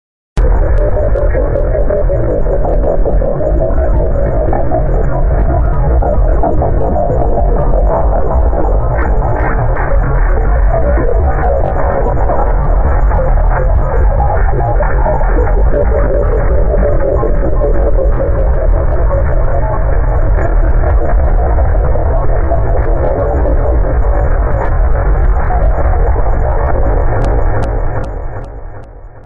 8. Distortion rumble underlying squeeks.
noise, processed